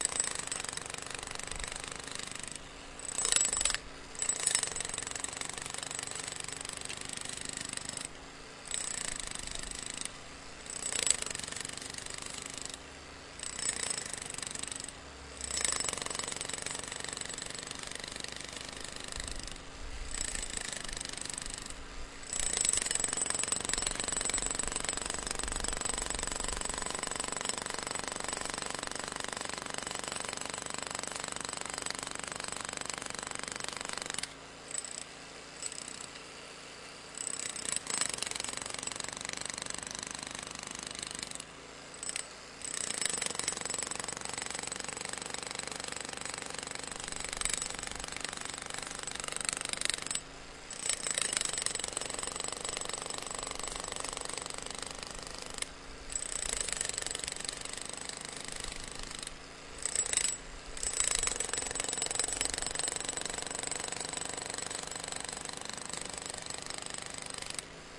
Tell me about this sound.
Sound of pneumatic hammer. Near recorded version. Recorded in the street.
Recorded at 2012-10-14.